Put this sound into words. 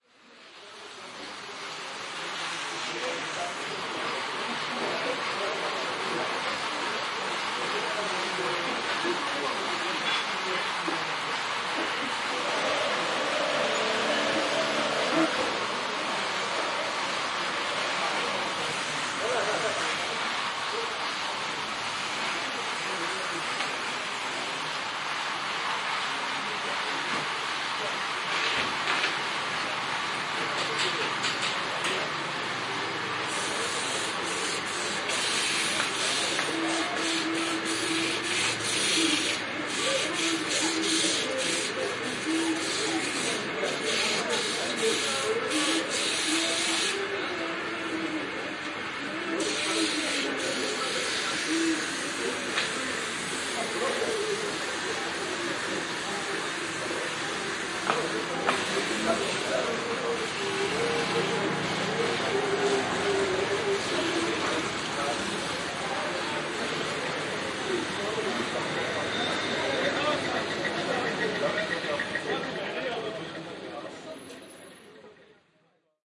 sewing and spraying medina marrakesh
This recording was made in Medina, Marrakesh in February 2014.
Marrakesh, Medina, sewing, spraying